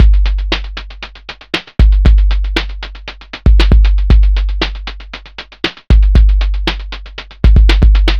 This is a sample rhythm from my collab piece with Snapper 4298.
It was created using a Buzz machine that emulates the 808 classic drum machine.
117 BPM.